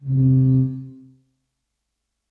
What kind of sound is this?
tuba note-12
ambience, ambiance, terrifying